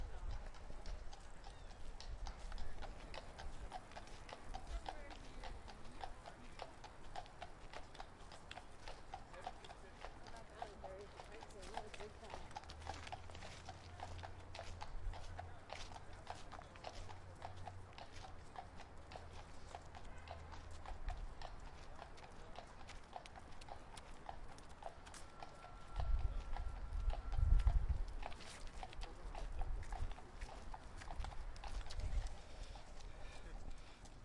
Horse Drawn Carriage Ride walking in Central Park, New York City
gallup, carriage, new-york, horse, drawn, central, gallop, walk